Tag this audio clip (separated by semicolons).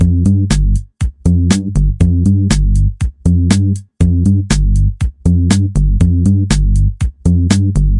battle; game; gamedev; gamedeveloping; gaming; indiedev; indiegamedev; loop; music; music-loop; videogame; Video-Game; videogames